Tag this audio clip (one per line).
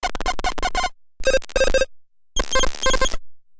click
noise
modulate
beep
glitch